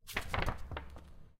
turn paper sheet2
Turning over a page in a book.
Recorded with Oktava-102 microphone and Behringer UB1202 mixer.
page, paper, rustle, sheet, turn-over